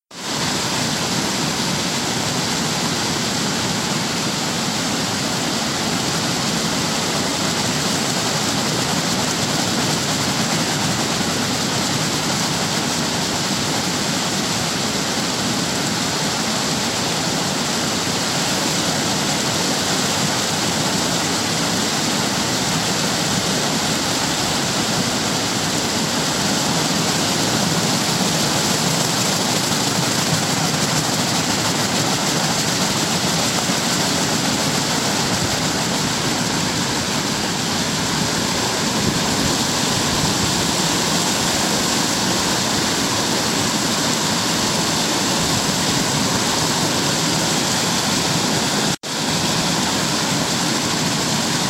The sound of Copper Creek Falls at Disney's Wilderness Lodge
waterfall, water, nature, ambience, flow